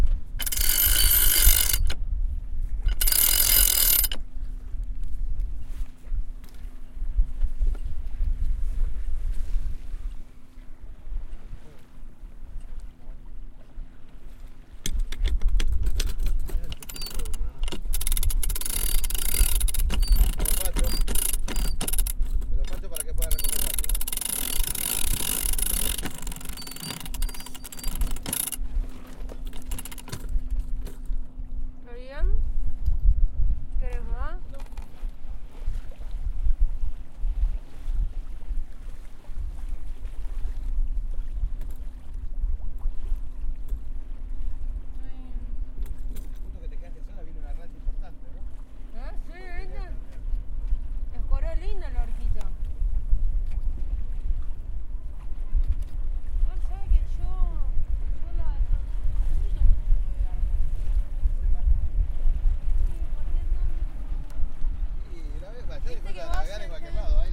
sailing-raising
Raising the sail before going out sailing.
raising-flag, sailing, water-ambience